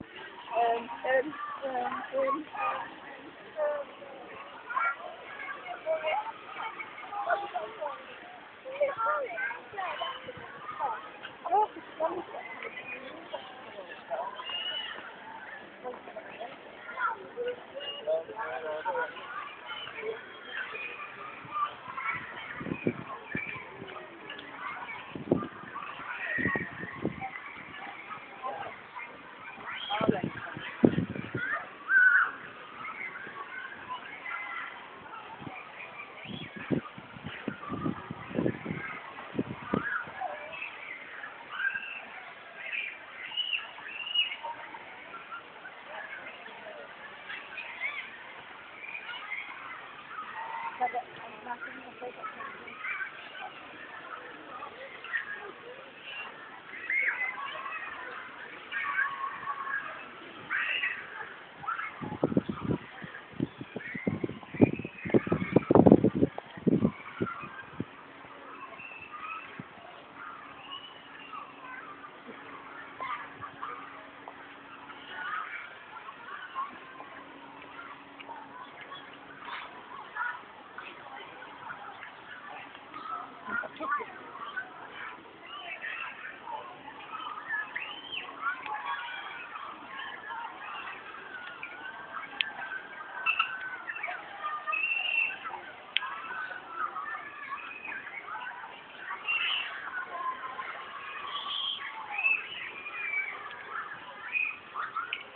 Clifton Park Water Splash Rotherham 16082012
This was recorded on a warm summer's day on 16th August 2012 near the Water Splash at Clifton Park, Rotherham at 1:16pm.
rotherham, yorkshire, summer, swim, clifton, water, kids, play, park, splash, south, children, fun, holidays